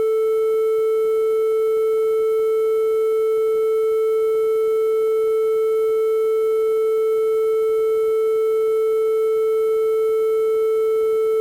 Doepfer A-110-1 VCO Triangle - A4
Sample of the Doepfer A-110-1 triangle output.
Captured using a RME Babyface and Cubase.
electronic, triangle-wave, wave, analog, Eurorack, modular, synthesizer, A-100, multi-sample, VCO, triangular, triangle, raw, oscillator, analogue, waveform, A-110-1, basic-waveform, sample